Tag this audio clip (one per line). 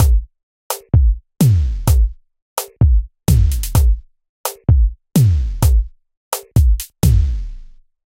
beat
drum
loop
slow
zoul